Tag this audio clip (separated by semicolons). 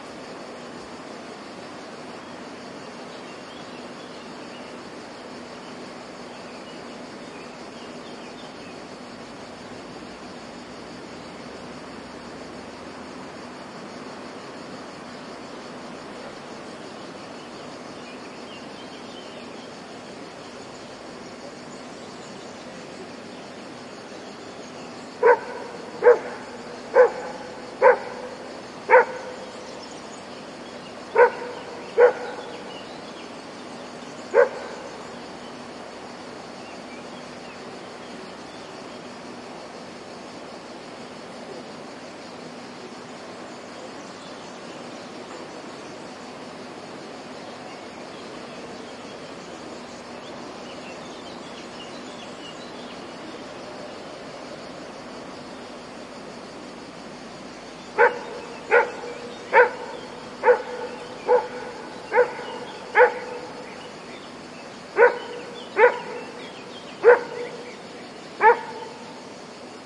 agriculture; country; countryside; dog; farmland; Italy; landscape; marche; meadowland; rural